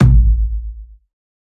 CRDN PNDLRGBT KICK - Marker #75
heavily pounding bassdrum originally made from 10 litre bottle punching sounds recorded with my fake Shure c608 mic and heavily processed by adding some modulations, distortions, layering some attack and setting bass part (under 200 Hz) to mono.
will be nice choice to produce hip-hop drums, or experimental techno also for making cinematic thunder-like booms
kickdrum, bassdrum, effected, processed, oneshot, designed, kick-drum, kick, one-shot, bottle